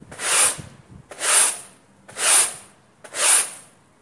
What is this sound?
A broom sweeps the floor
Broom, carpet, cleaner, cleaning, floor, household, sweep, sweeps